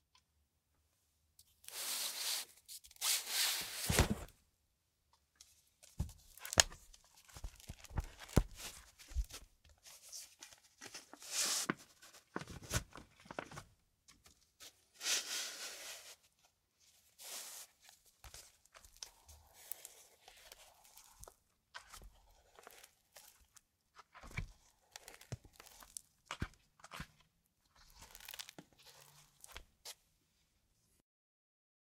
Recorded with a Behringer B-1, this is the sound of an old book being handled.